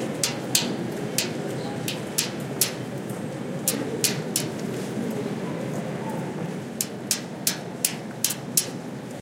20091217.13.metal.knocking
knocking softly with a key on a big metal sculpture. Recorded in Seville (Plaza Nueva) during the filming of the documentary 'El caracol y el laberinto' (The Snail and the labyrinth), directed by Wilson Osorio for Minimal Films. Shure WL183 capsules, Fel preamp, Olympus LS10 recorder.
ambiance, city, field-recording, metal, seville